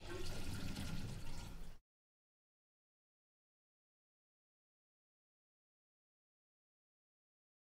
The sound of water going down a sink drain.